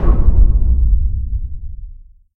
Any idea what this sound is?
Explosion sounds make with Audacity with white noise and other types of noise.
detonation, bang, boom